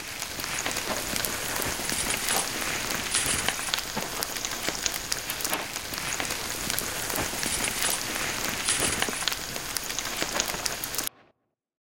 Just a regular bonfire with some metal sounds and charcoal
Fire, burn, charcoal, bonfire, metallic